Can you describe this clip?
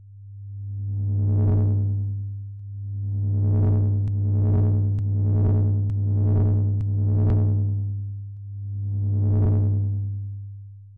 LARCHER Lucas 2018 2019 laser saber
Steps: Sound made on Audacity. I created a track and generated a “Risset Drum” with the following values: “frequency”: 100hz ; “decay”: 2s ; amplitude: 0,800 ; center frequency of noise: 500hz ; width of noise band: 400hz ; amount of noise: 40%. Then, I duplicated this sound nine times and “reversed” it half of the time. I cut some part of the sounds to reduce the delay between a couple of them. Finally, I used the effect “reverb” on the whole track, with the following setups: “room size”: 100% ; “pre-delay”: 10ms ; “reverberance”: 50% ; “damping”: 50% ; “tone low”: 100% ; “tone high”: 100% ; “wet gain”: -1db ; “dry gain”: -1db ; “stereo width”: 100%. Then, I “normalized” the whole track and reduce the gain by 10. Later, I figured out that playing the track at half speed (0,50) creates a great sci-fi sound environment.
Descriptif selon la Typologie/morphologie de P. Schaeffer : Son de type continu varié (V).
laser, sci-fi, space, weapon